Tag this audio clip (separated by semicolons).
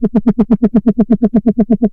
dry; analog; synth; looped